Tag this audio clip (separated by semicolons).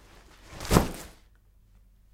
body-hit; impact; bag; rucksack